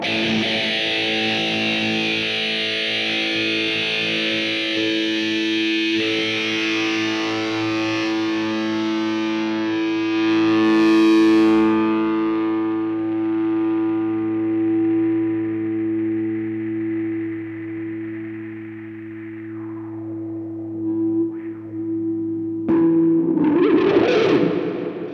A processed guitar sample I recorded using a Stratocaster with a bit of whammy bar abuse at the end. Could be used as an intro or perhaps better as an outro. Ripe for more extreme processing.
heavy, music